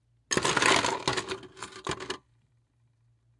Scoop in Ice Bucket FF279
Scooping ice out of container full of ice, ice hitting scooper, ice hitting ice